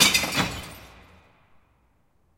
Glass Drop 7
Throwing away glass trash.